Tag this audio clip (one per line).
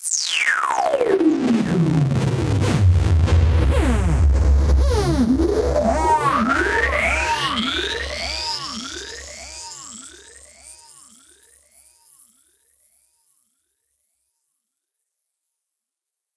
weird
sweep
fx
future
sound-design
soundeffect
synth
freaky
acid
sci-fi
digital
electronic
sfx